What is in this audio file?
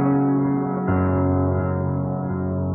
My Casio synth piano with distortion and echo applied. An excerpt from a longer recording.